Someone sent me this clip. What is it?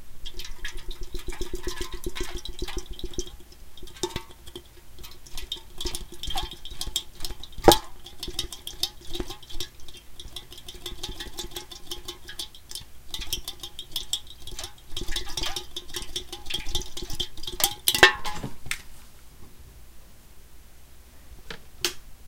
Me violently shaking a 7-up can with only a few drops in it near the mic until the tab breaks off and the can flies off hitting the floor just to my right. The file ends when I pick it up. Recorded with cheap 12-year-old Radio Shack mic.